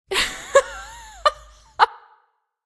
Female Laugh
Recording is fun, some of the lighter moments extracted from vocal takes (singing). Recording chain Rode NT1-A (mic) etc...